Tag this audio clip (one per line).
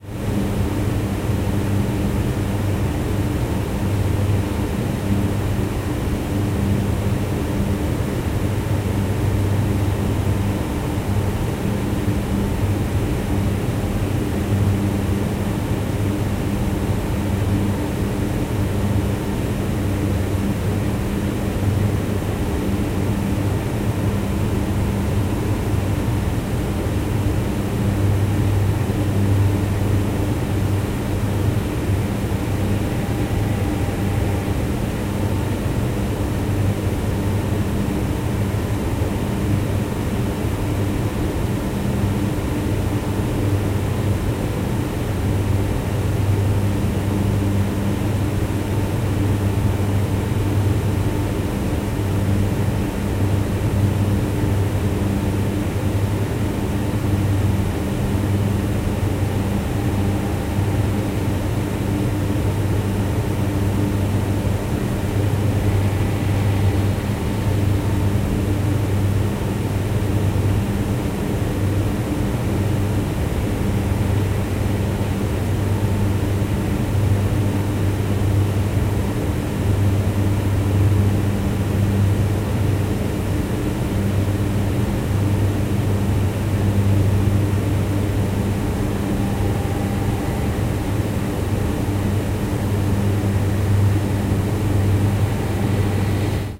sounddesign
surround
roomtone